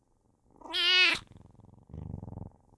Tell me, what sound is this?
kitten meow not cute purr
kitten meows not cute and purring
cute, not, meows, purr, kitten